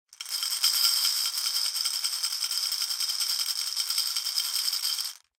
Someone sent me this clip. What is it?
Glass marbles shaken in a small Pyrex bowl. Bright, glassy, grainy sound. Close miked with Rode NT-5s in X-Y configuration. Trimmed, DC removed, and normalized to -6 dB.